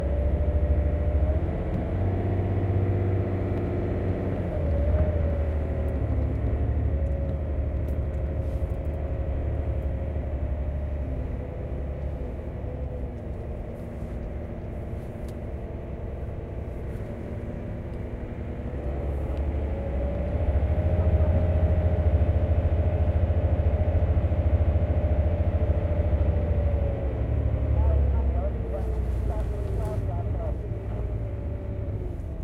truck-inside-03
Inside the cabin of a haul truck, filled with sand, driving.